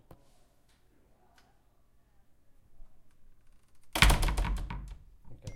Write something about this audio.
this is E203's door closing